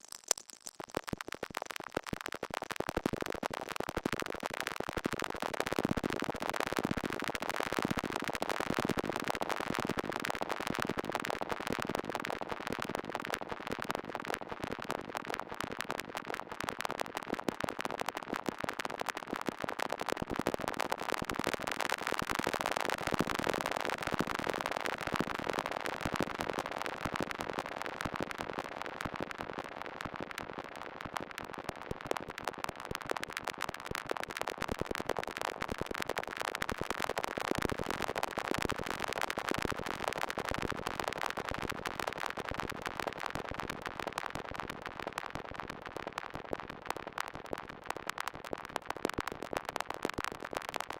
synthetic, cricket-like sounds/atmo made with my reaktor-ensemble "RmCricket"